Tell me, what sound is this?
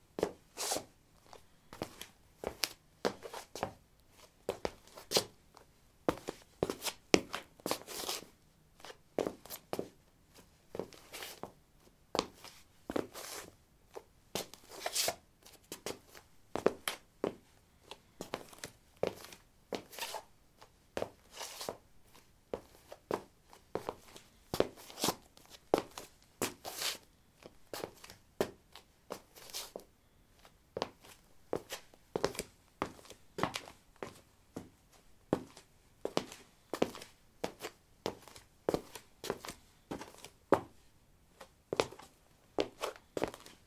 lino 08b womanshoes shuffle threshold
Shuffling on linoleum: woman's shoes. Recorded with a ZOOM H2 in a basement of a house, normalized with Audacity.
footstep, walking, footsteps, steps, step, walk